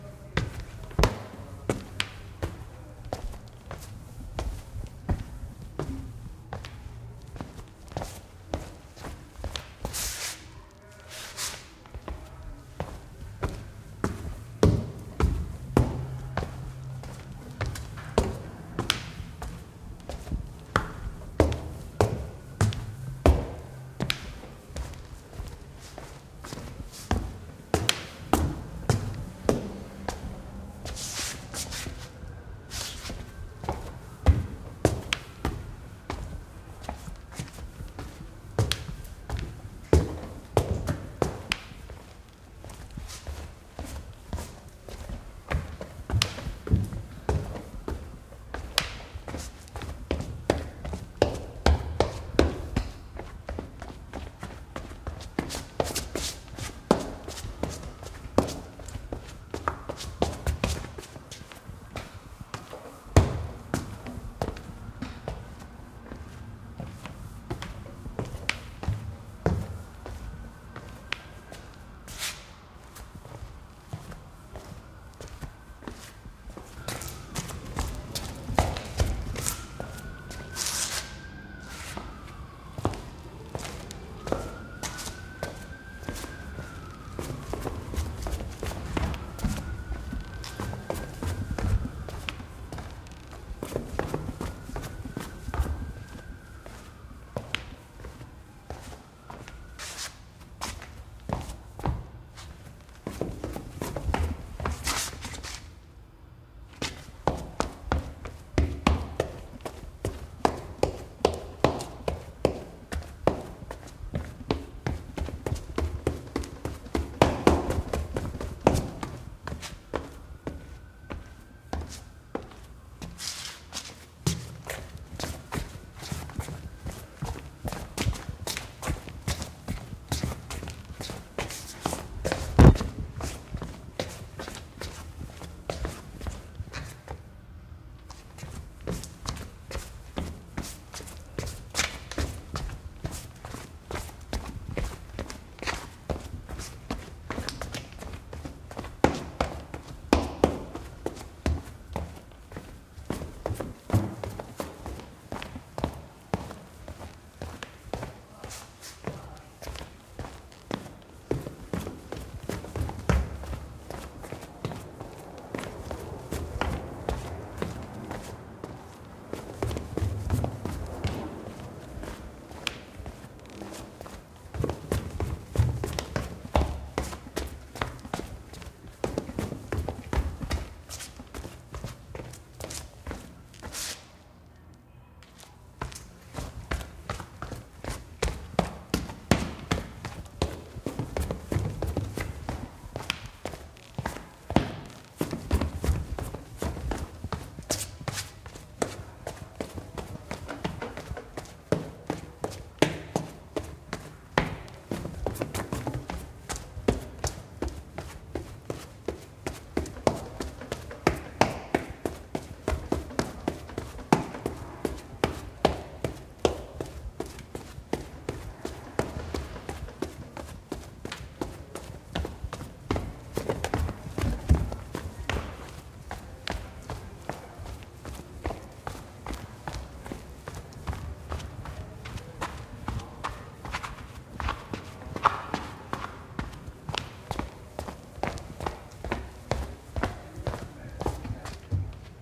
Footsteps marble NYC Interior Lobby Foot step footsteps footstep shuffle steps walking light run
Recorded in a NYC apt building. Some light city ambience and sirens. Sneaker foley footsteps shuffle on marble Walking light run on marble